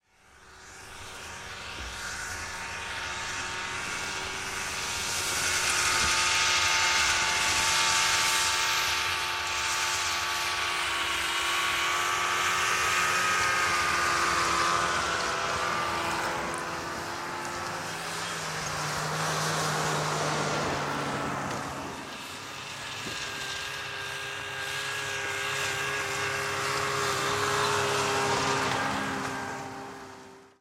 snowmobiles pass by medium speed nice thin
snowmobiles, by, medium, pass, speed